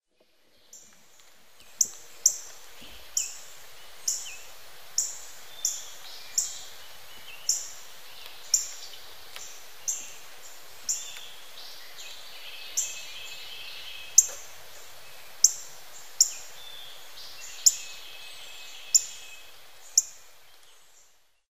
CardinalChirpingParabolicMP3VersionMay62013
A short, simple recording of a Cardinal chirping in deep woods during a light rain. He gets closer toward the end of this 21 second recording---very simple, yet peaceful.
I used by Zoom H4N recorder and, my homemade Parabolic dish in which I mounted my Rode NTG-2 microphone in....
Kevin